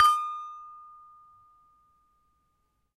Sample pack of an Indonesian toy gamelan metallophone recorded with Zoom H1.